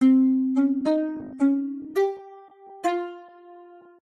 258090 orpheuz santur-phrase hpsModel sines
Harmonic sound extracted with sms-tools, HPS model, with parameters:
windowType: blackman
windowSize (M): 1765
FFTSize (N): 2048
# parameters to identify peaks and harmonics:
Magnitude threshold (t): -100
Min duration: 0.1
Max number of harmonics: 147
Min f0: 250
Max f0: 400
Max error in f0 detection: 7
Max freq dev in harmonic tracks: 0.01
Stochastic approximation factor: 0.2
ASPMA, Harmonic, Model